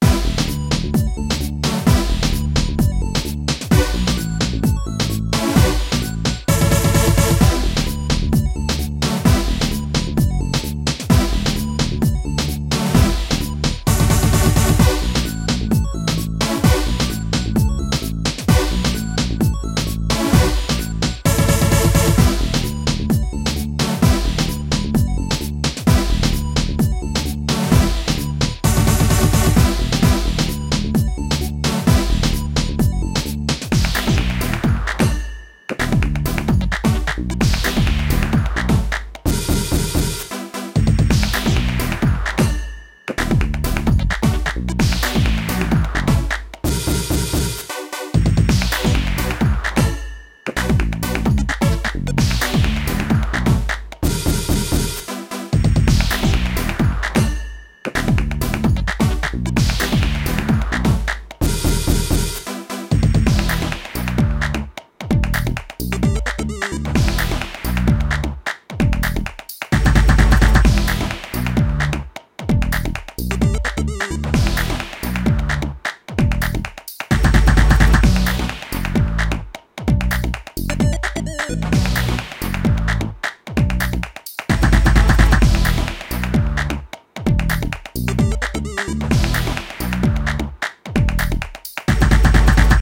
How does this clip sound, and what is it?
Un Poco de Chile 130 bpm
I created these perfect loops using my Yamaha PSR463 Synthesizer, my ZoomR8 portable Studio, and Audacity.
loops, beats, drums, dubstep, rock, groove, guitar, loop, bass, synthesizer, pop, music, bpm